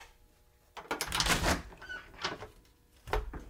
Wooden Door Open 1
Wooden Door Closing Slamming open